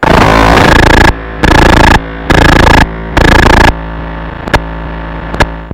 Noise RythmZ
This is a Casio SK-1 I did around a year ago or so From Reeds book plus a video out and 18 on board RCA jacks with another 25 PIN DPI that can run through a breakbox. Noise and Bent Sounds as Usual. Crashes ALOT. Oh and it's not the hardest "mother of bends" Serious, I wore socks and everything.
background, casio, circuit-bent, forground, glitch, if-your-crazy, lo-fi, noise, old, rca, scenedrop, school, sfx